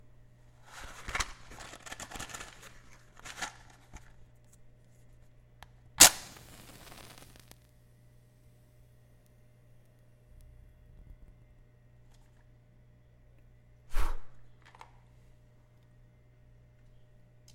Foley sound of matchbox opening, selecting a match, striking the match, blowing the match out.